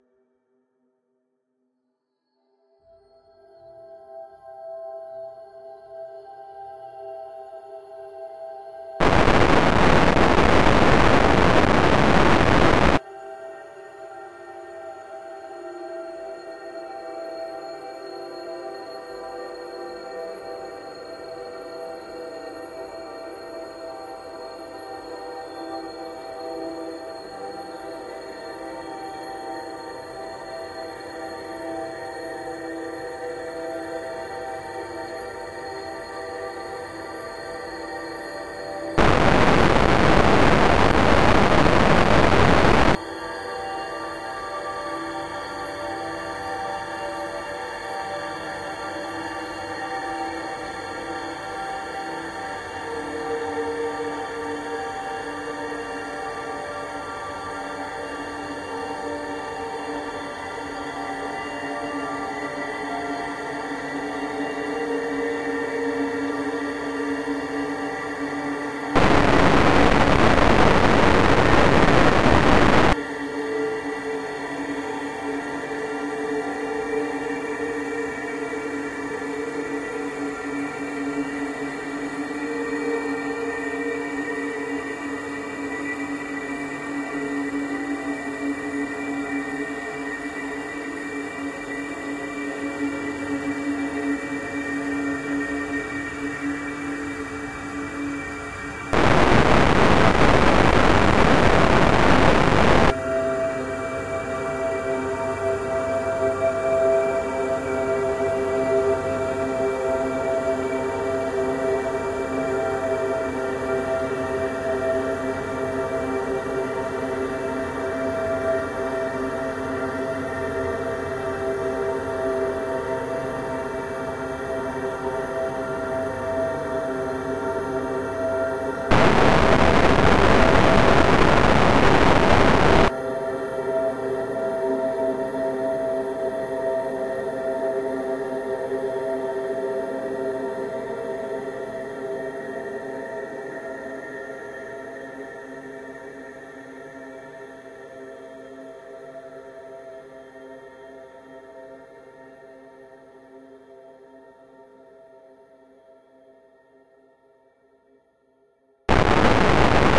CARBON BASED LIFEFORMS-81
LAYERS 012 - Carbon Based Lifeforms is an extensive multisample package containing 128 samples. The numbers are equivalent to chromatic key assignment covering a complete MIDI keyboard (128 keys). The sound of Carbon Based Lifeforms is quite experimental: a long (over 2 minutes) slowly evolving dreamy ambient drone pad with a lot of subtle movement and overtones suitable for lovely background atmospheres that can be played as a PAD sound in your favourite sampler. The experimental touch comes from heavily reverberated distortion at random times. It was created using NI Kontakt 4 in combination with Carbon (a Reaktor synth) within Cubase 5 and a lot of convolution (Voxengo's Pristine Space is my favourite) as well as some reverb from u-he: Uhbik-A.
drone, experimental, multisample, soundscape